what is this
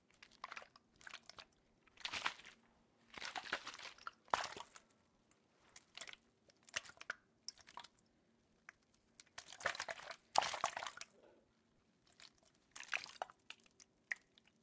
water shaking in plastic bottle
bottle, plastic, water